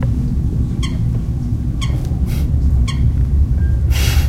Fotja- Deltasona
Sound recorded in the framework of the workshops "El Delta del Llobregat sona" Phonos - Ajuntament del Prat - Espais Naturals Delta. Nov 2013.
airplanes, Bird, elprat, nature, wind